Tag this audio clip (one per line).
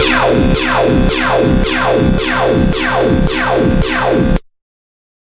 LFO
porn-core
synthetic